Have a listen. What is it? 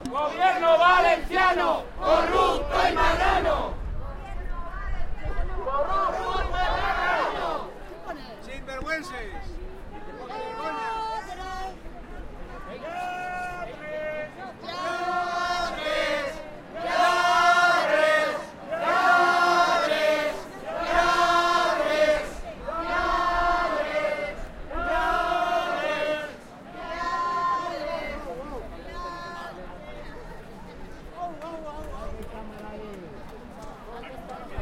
Manifestació PAH: crits lladres
So enregistrat a la manifestació pel dret a l'habitatge a València, convocada per la Plataforma d'Afectades per les Hipotèques.
16 feb 2013
manifestaci, PAH, hipoteques, n, habitatge, imPAHrables, protests, protestes, Valencia, demonstration